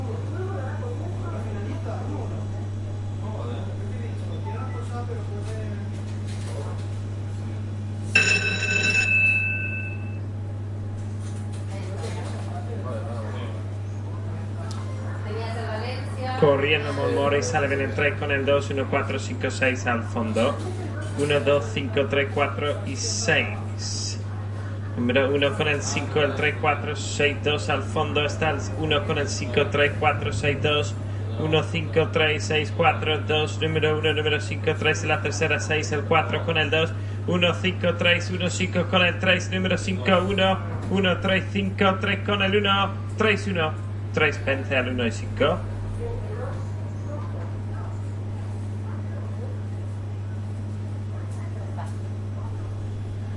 apuesta galgos
Sounds of a greyhound race. Speaker.
bets, gameroom, Greyhound